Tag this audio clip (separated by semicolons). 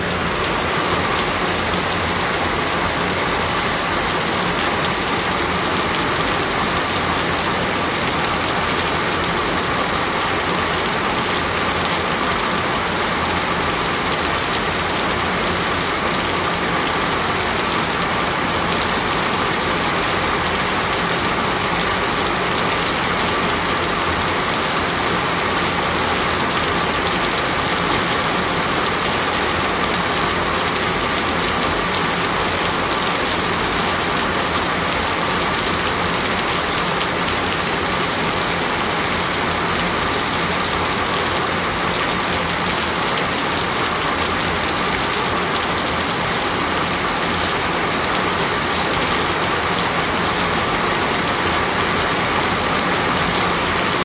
drip; field-recording; heavy; music; nature; rain; raining; sleep